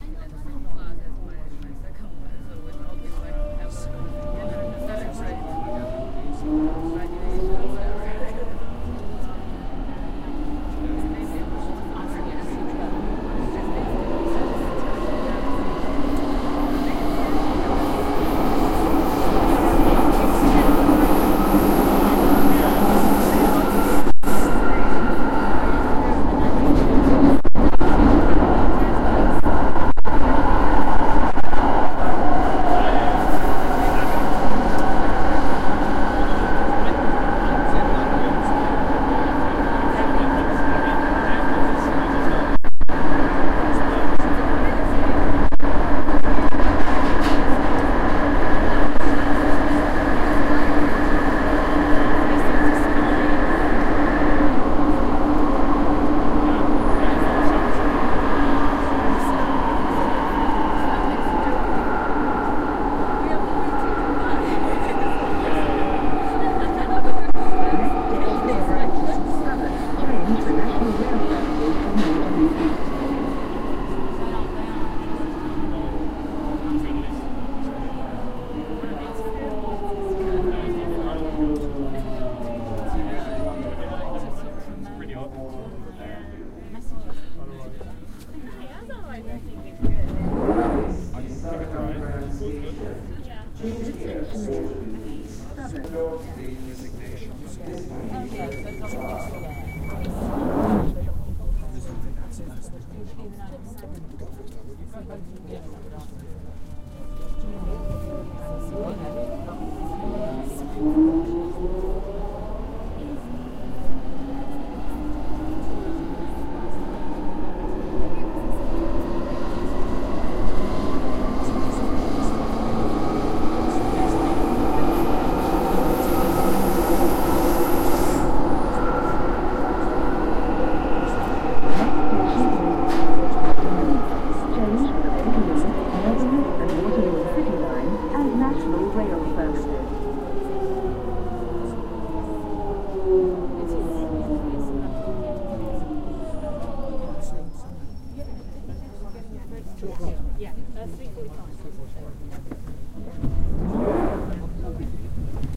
lbg-wat-jubilee
Inside a carriage on the Jubilee Line (London Underground: "The Tube"). A short journey from London Bridge to Waterloo, with a stop (around 1:30) at Southwark station.
engine, onboard, underground, tube, london, transport, rail, motor, railway, field-recording, journey, carriage, train, electric, doors, conversation, jubilee, travel